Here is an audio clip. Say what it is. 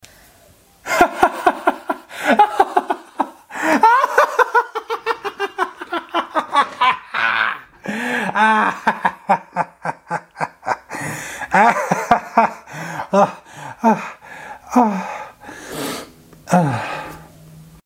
Evil Laugh 5
Condescending Evil Man Laughing Crazy and Madly